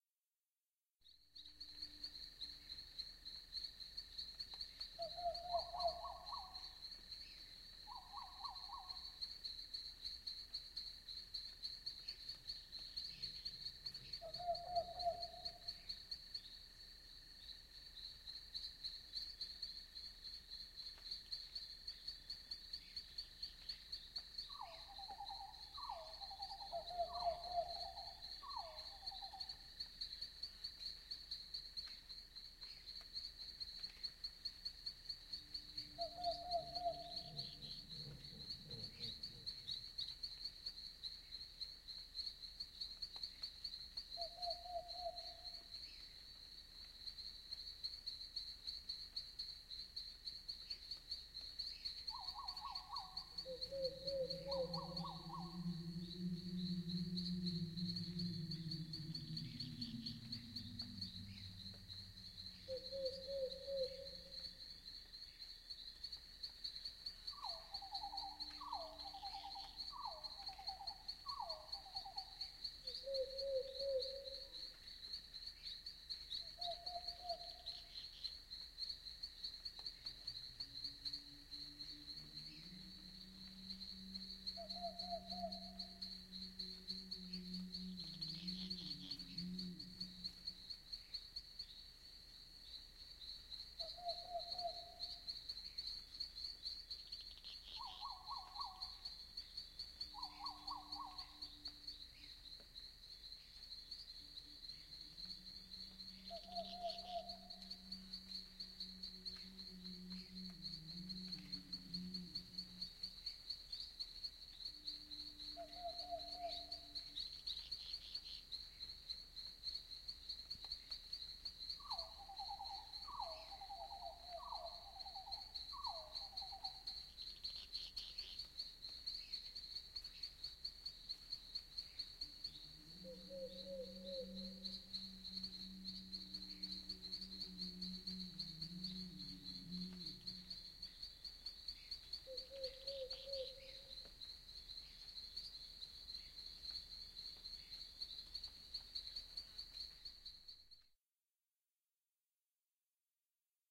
ambience spooky forest